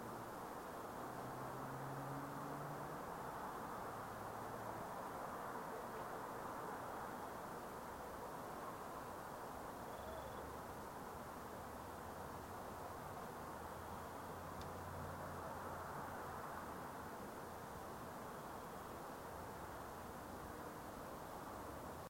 Roomtone Outside Neighborhood Night
neighborhood room tone outside
neighborhood, room-tone, outside